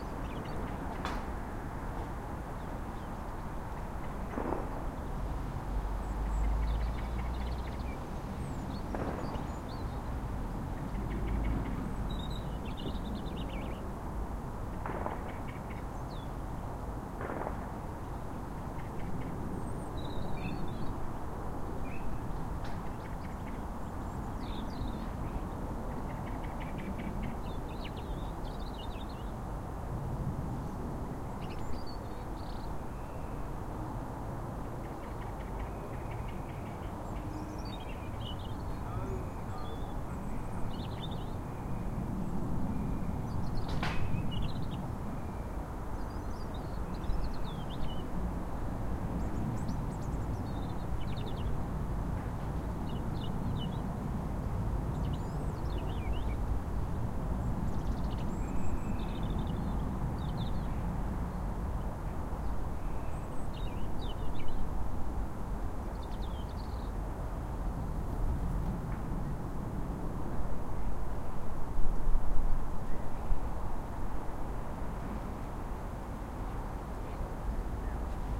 Back Neighbour construction 04-Oct-2015 010
Recording of construction work at my neighbour's. On review of the recording, I found an 'unknown' sound.
The first sound in the recording is the nail-gun.
Then the unknown sound, like 'cracking' appears several times, the first one at 4.5s.
The sound seems to come from much further away than the builders in the next garden. Maybe it is fireworks?
Unknown sound at ~4.5s, 9s, 15sand 17s.
At around 29s you hear one of the builders saying "No, no (...)" and then speach is indistinct.
Nail-gun twice at 44.5s.
The rest of the recording is just bird sounds.
builders, build, unknown-sound, birds, firewords